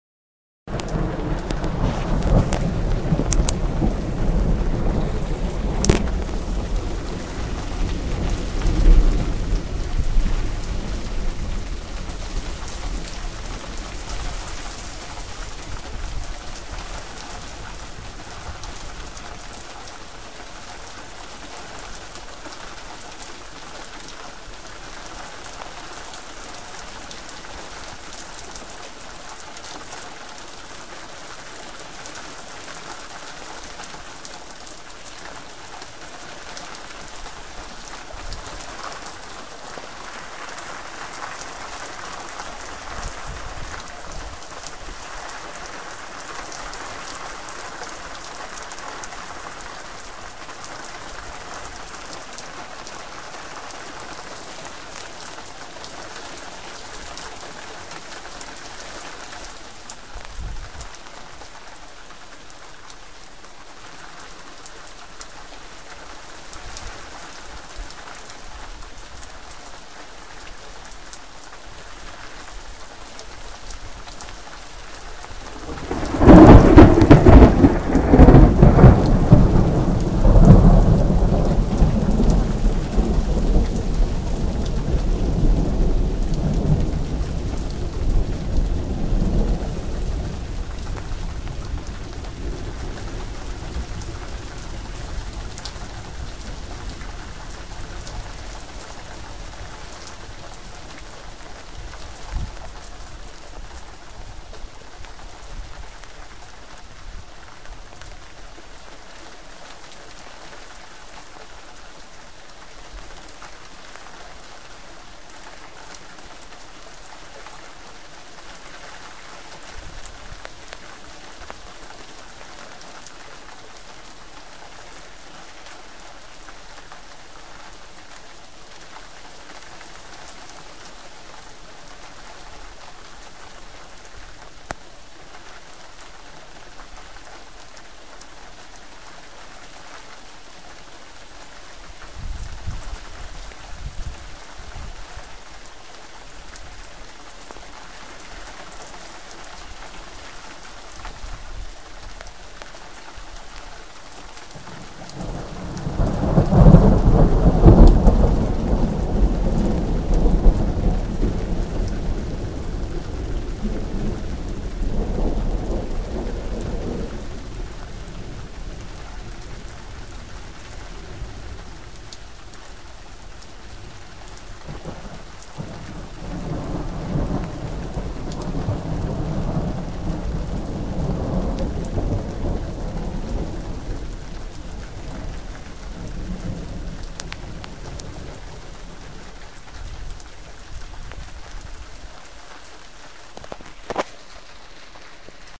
Recorded on samsung galaxy ace
Heavy rain and loud overhead thunder